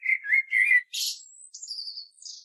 Turdus merula 19
Morning song of a common blackbird, one bird, one recording, with a H4, denoising with Audacity.
nature, blackbird, field-recording, bird